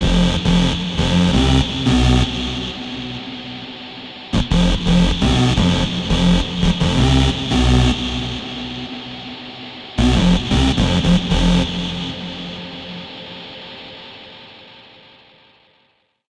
gabber synth supa fricked
hardcore, neurofunk, hardstyle, 170, synth, gabber, schranz, jungle, riff